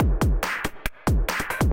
acidized beats with fx
acidized, beats, fx